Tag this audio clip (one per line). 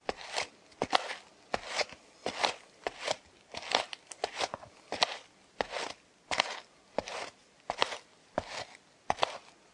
footsteps
ground